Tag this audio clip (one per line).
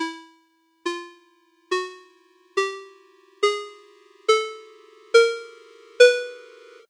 19
3
alert
ascend
cell
cell-phone
free
jordan
mills
mojo-mills
mojomills
mono
phone
ring
ring-alert
ring-tone
tone